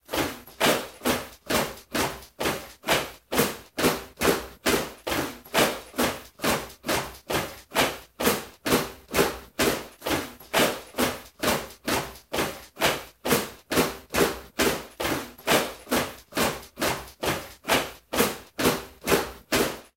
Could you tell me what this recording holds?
Marcha fuerte
foley sounds marching